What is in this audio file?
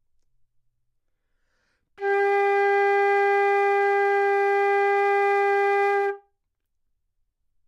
Part of the Good-sounds dataset of monophonic instrumental sounds.
instrument::flute
note::G
octave::4
midi note::55
good-sounds-id::3029
multisample, flute, G4, good-sounds, single-note, neumann-U87